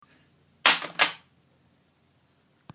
Drop Smartphone 2
Smart phone dropping on the floor.
smart-phone-drop, iphone-drop